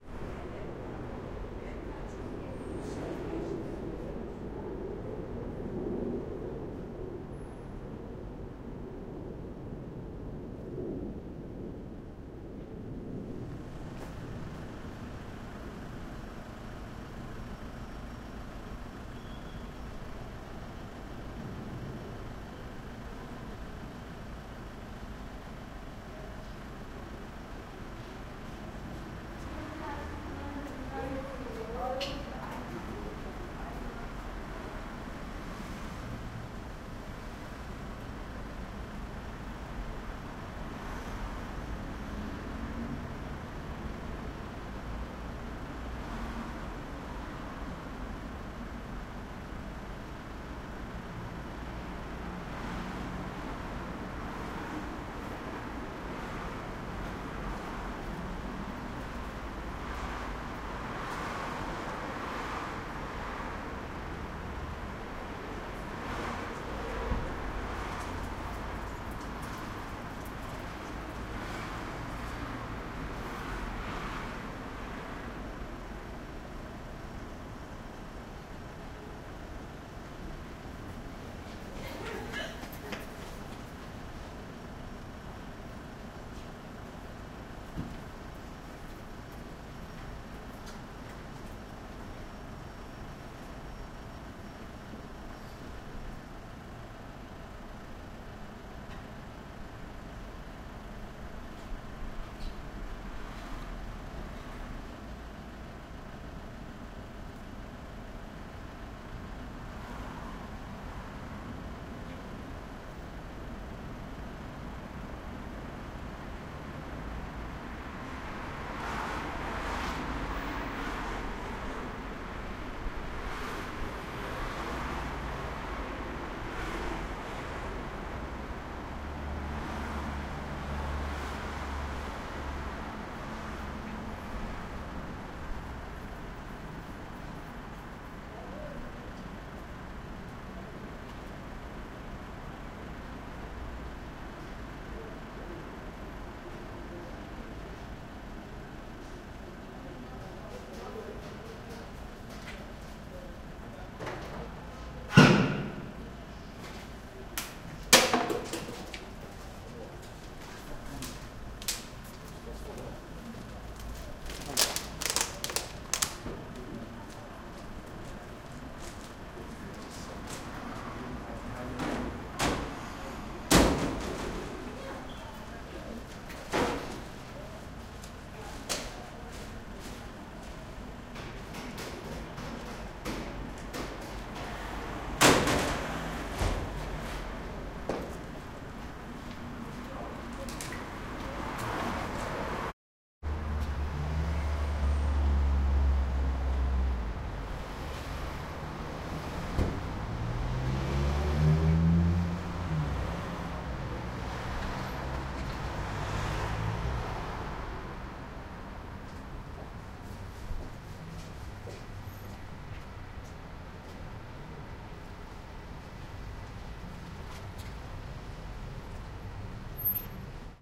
Standing in a tunnel (house entrance/entry) next to a main street. People walking past, cars driving past, some people throwing away trash.
Recorded with a Zoom H2.